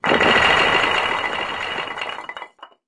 Bricks Falling 2
A stereo Foley of a load of kilned clay bricks falling.
falling, stereo, dropping, collapse